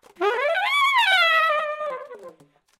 A wailing sound from the alto sax.
event, howie, sax, smith, wail